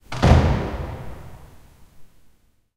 While recording some birds with a Zoom H2, a gate was closed in the courtyard at night.
castle, closing, courtyard, door, falling, forced, gate, hideout, lair, lock, locked, locking, night, opening, slam